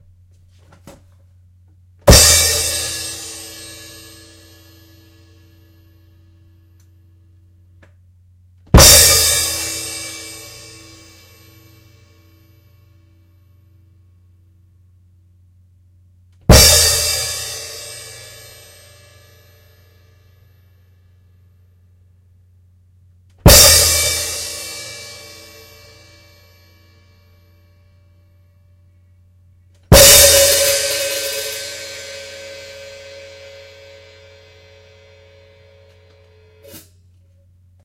some Crashes on my Sabian B8 crash cymbal.